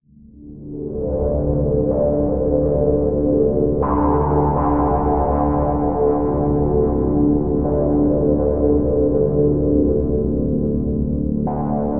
Layered pads for your sampler.Ambient, lounge, downbeat, electronica, chillout.Tempo aprox :90 bpm
sampler, downbeat, layered, texture, ambient, pad, electronica, chillout, synth, lounge